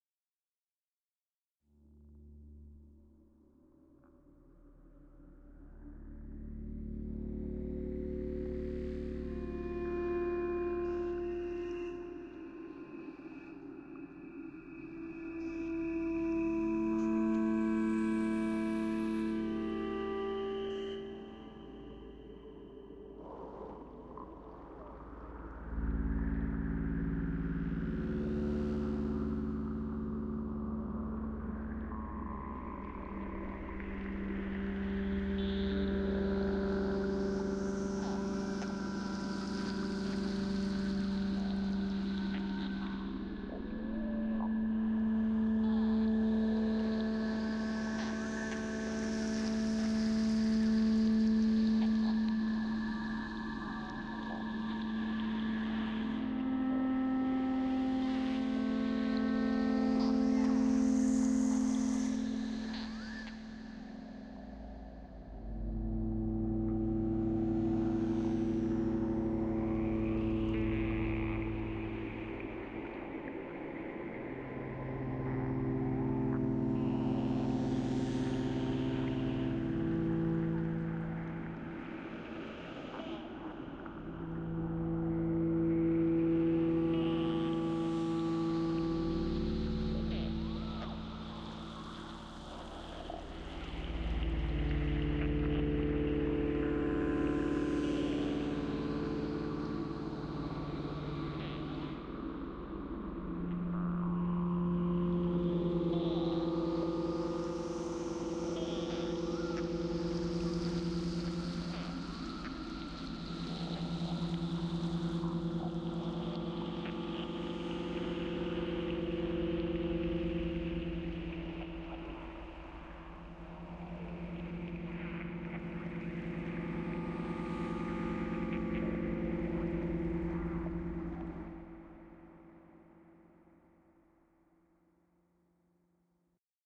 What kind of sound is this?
Sonic Ambience 2
A slow, melodic, background soundscape derived from various field recordings.
ambience, atmosphere, background, drone, evolving, melodic, soundscape